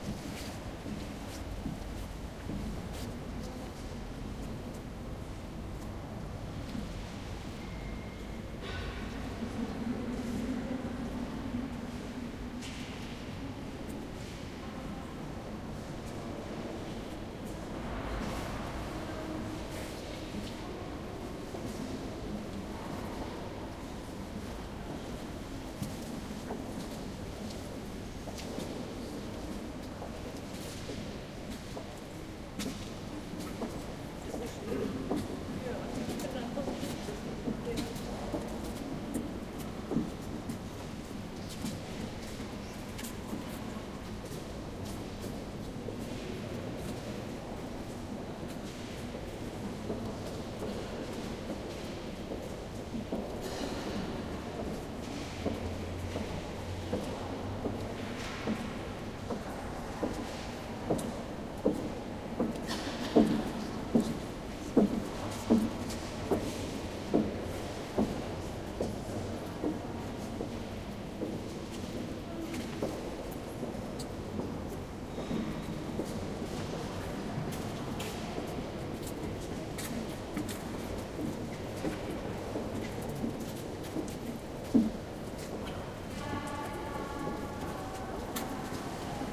05 Speyer Cathedral main transcept
These recordings were made during a location-scouting trip I took some time ago to southern Germany, where we had a look at some cathedrals to shoot a documentary.
I took the time to record a few atmos with my handy H2...
This recording was done in the Speyer Cathedral's main transcept.
Architecture, Atmosphere, Cathedral, Field-Recording, Germany, History, Large, Leisure, People, South, Walking